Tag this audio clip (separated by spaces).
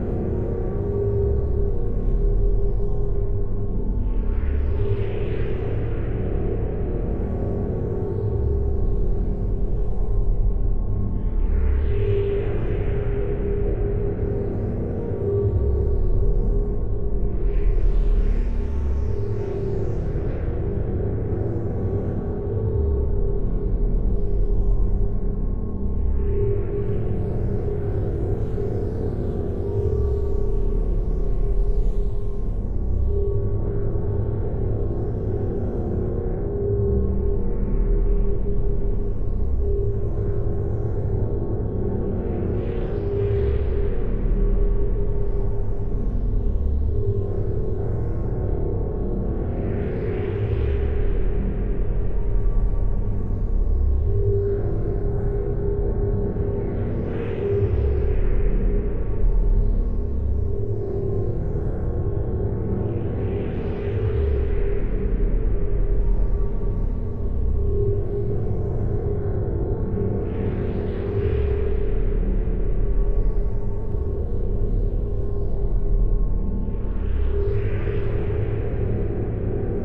Eerie Atmosphere Ambient Scary Horror Drone Outer-Space Dark